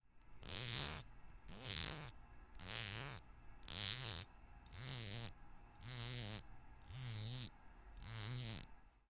whisk handle - toothpick
scraped the edge of a metal whisk with a wooden toothpick: four times in one direction, four times in the other direction.
toothpick, scraped, scraping, MTC500-M002-s14, rubbing, whisk